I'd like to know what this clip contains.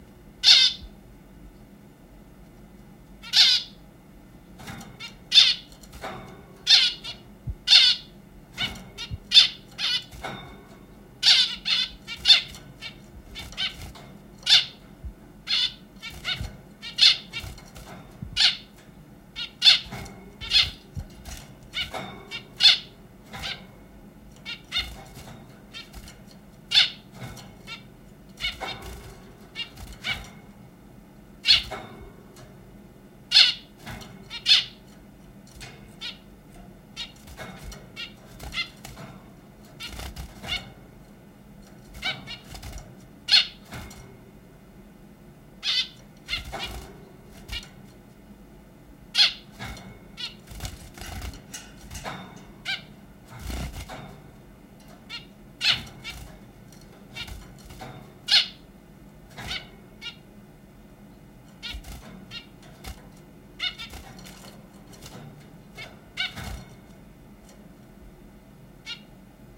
Bird cage
Two zebra finches flying around their cage and making noises. Captured with a dynamic microphone from a short distance outside the cage. Unfortunately there is some background airflow noise from my computer. Low-cutted at 50Hz.
bird, birds, zebra-finch